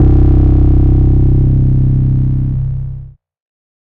Acid Bass: 110 BPM C2 note, not your typical saw/square basslines. High sweeping filters in parallel Sampled in Ableton using massive, compression using PSP Compressor2 and PSP Warmer. Random presets, and very little other effects used, mostly so this sample can be re-sampled. 110 BPM so it can be pitched up which is usually better then having to pitch samples down.
110, 808, 909, acid, bass, beat, bounce, bpm, club, dance, dub-step, effect, electro, electronic, glitch, glitch-hop, hardcore, house, noise, porn-core, processed, rave, resonance, sound, sub, synth, synthesizer, techno, trance